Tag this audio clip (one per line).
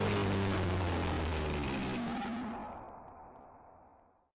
spooky
scary